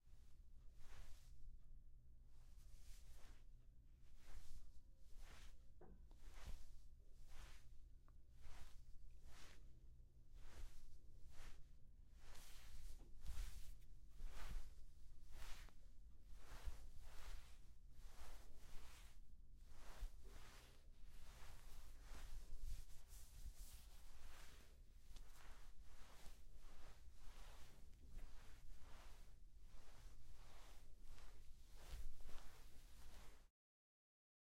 5-Clothing movement
Clothing, motion, move, movement, moving